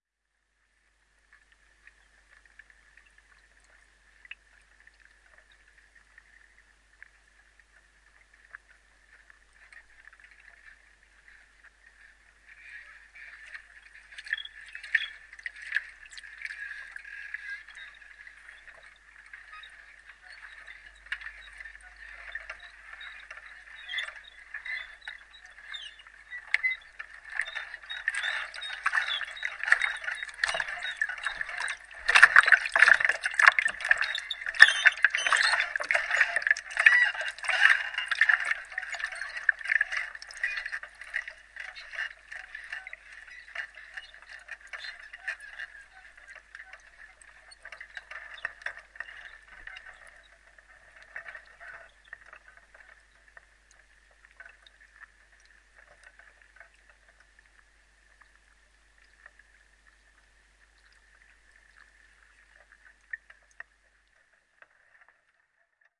Hydrophone Newport Wetlands Pontoon Movement 01
This is a collection of sounds gathered from the Newport Wetlands Nature Reserve in Newport, UK.
I had the chance to borrow a hydrophone microphone from a very generous and helpful friend of mine.
There is quite a bit of high frequency hissing where I had to boost the gain to get a decent signal, but on a few (I thought I had broken the microphone) you can also hear the power line hum. It was a surprise to hear!